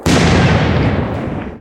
explosion wide edit
Made with fireworks